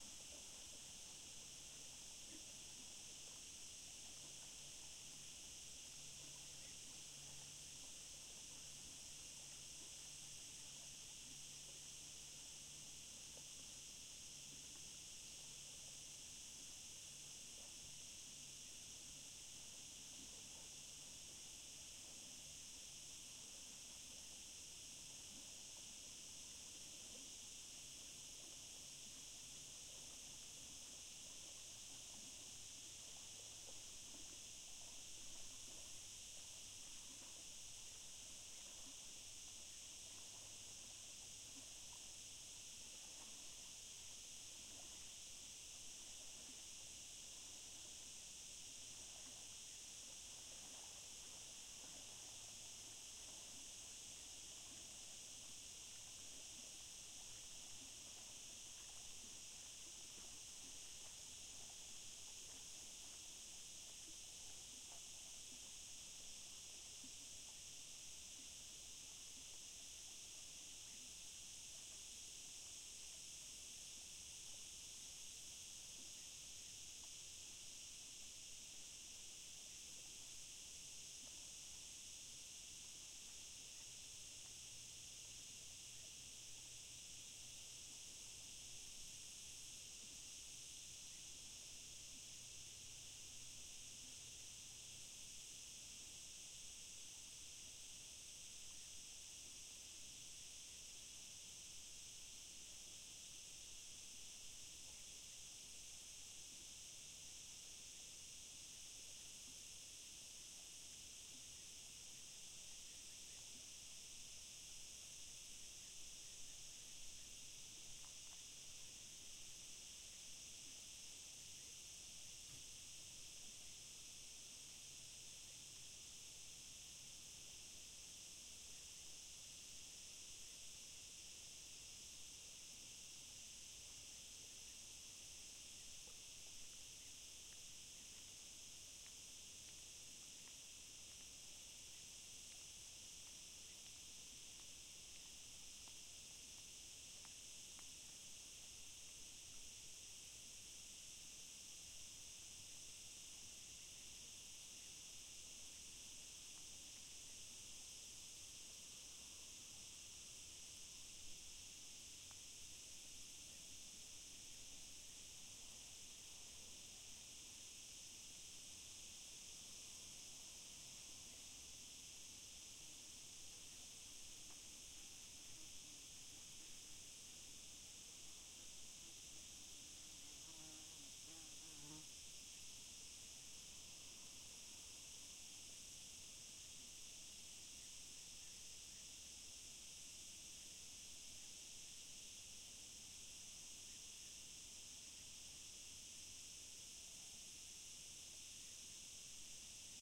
Ambience RioSabor
Sunset natural ambience of the Rio Sabor Valley, near Mogadouro in the northeast Portugal.
Recorded with a Tascam DR40 during my vacations.
soundscape, ambience, ambient, nature, sunset, wild, field-recording